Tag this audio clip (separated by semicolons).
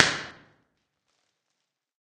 bang; crack; puff; smack; smacker; snapper